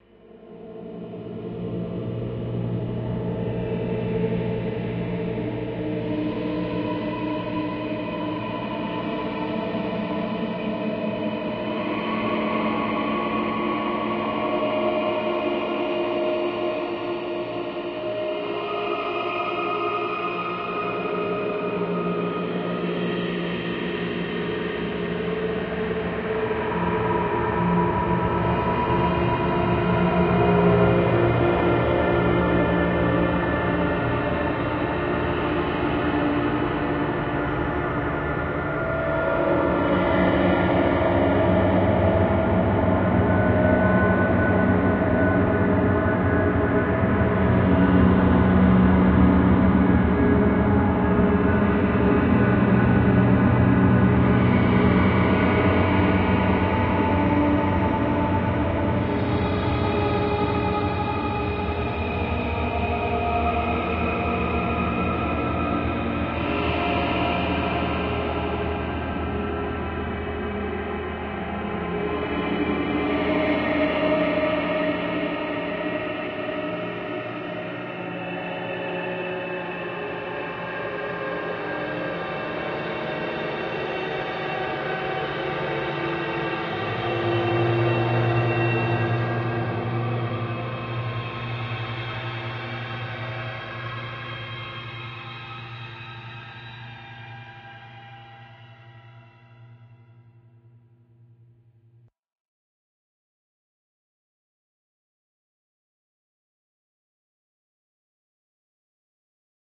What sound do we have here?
ambient sounds 13
Scary ambient sounds... almost musical, perfect for a horror scene in a movie or a game. Try morphing it further by reversing and/or time-stretching it!
Fully made with a 7-string electric guitar, a Line 6 Pod x3, and delicious amounts of post-processing, sampling and VST effects :D
alien, ambience, ambient, background, creepy, dark, drone, effect, fear, film, filter, fx, game, guitar, guitareffects, horror, illbient, lovecraftian, monstrous, movie, scary, soundesign, soundtrack, spooky, suspence, suspense, terrifying, terror, texture, thrill